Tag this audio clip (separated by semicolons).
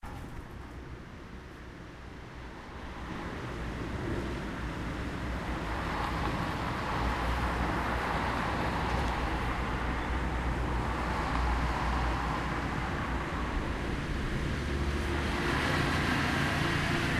labinquenais; rennes; sonicsnaps